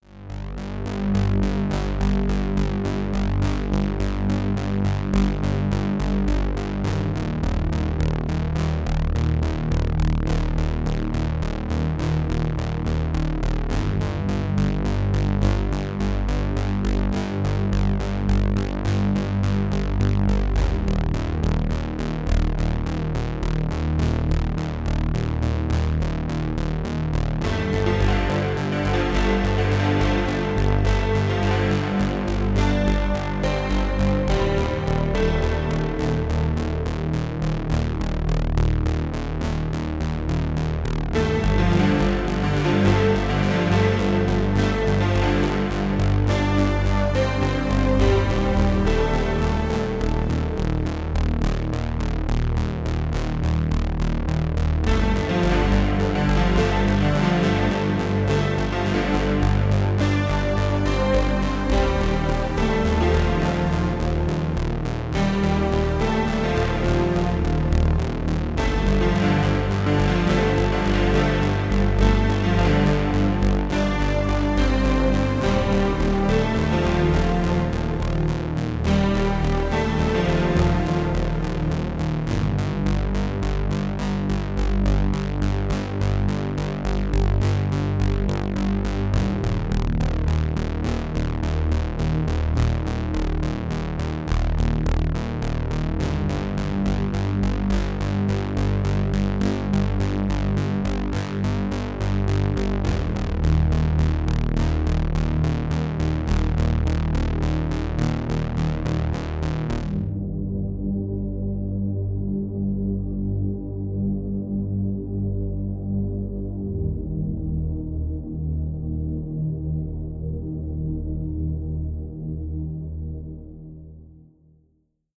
A simple synth theme I made using arpeggio effect. Could be used in a 80's setting. Enjoy!